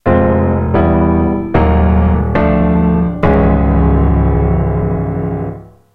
evil entrance chords (good)
Chromatic sequence of inverted minor chords played on a digital piano. Panto villain signifier.